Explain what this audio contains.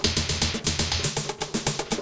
kbeat 120bpm loop 9
A slightly ethnic sounding drum percussion loop at 120bpm